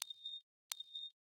Short, squeaky synthetic sound. Created using Ableton's Operator synth.
door, metal